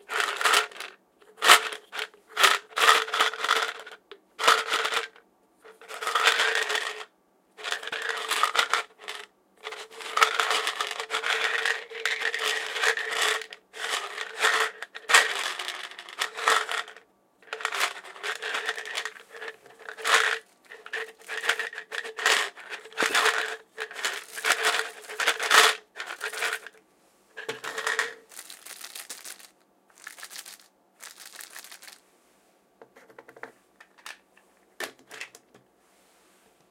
pill bottle being shaken